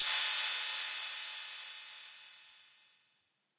CYMBAL RD-6
Samples from my Behringer RD-6 SR analog drum machine, which is a clone of the legendary ROLAND TR-606. BD, SD, CLAP, CHH, OHH, CYMBAL, LT, HT.
Recorded with a Behringer UMC 404 HD.
Analogue, Behringer, Drumcomputer, RD-6